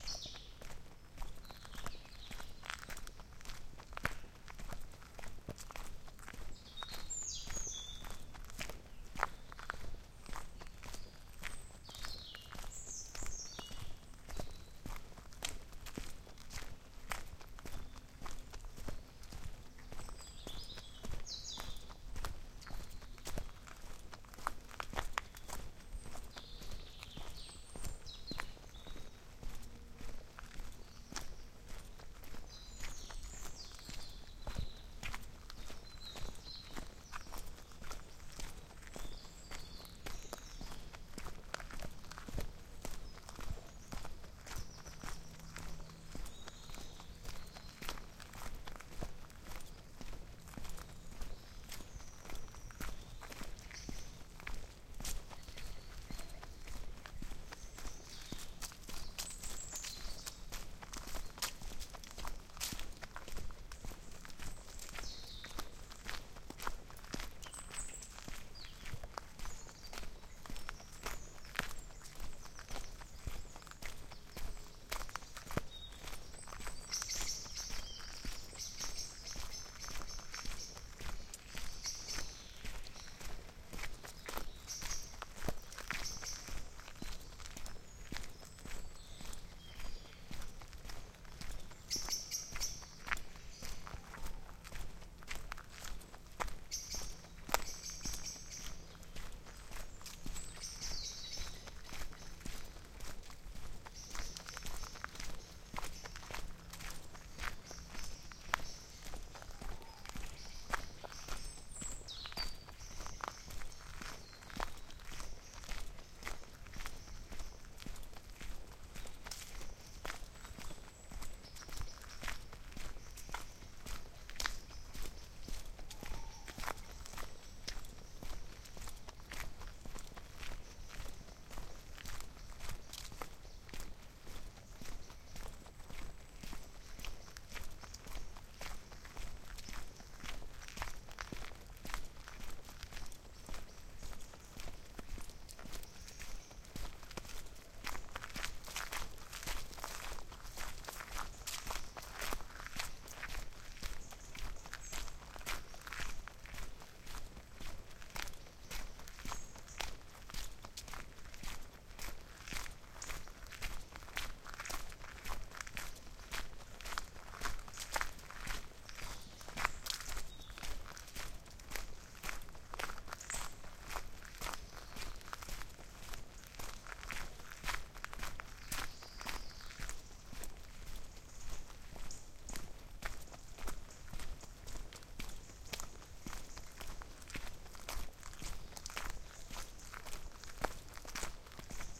A little record from walk in the forest...